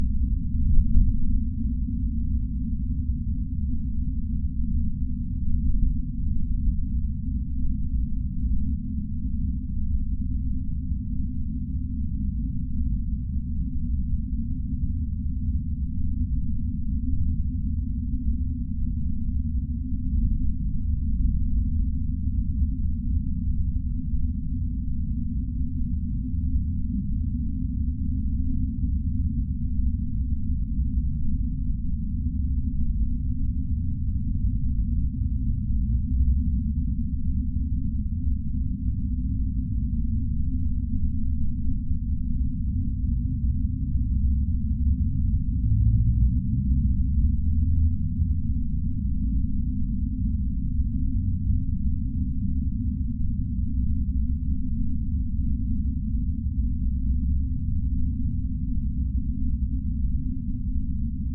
dark ambient underwater deep
dark, ambient, underwater, deep, processed, fantasy, scifi